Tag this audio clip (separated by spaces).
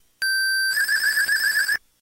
boy game layer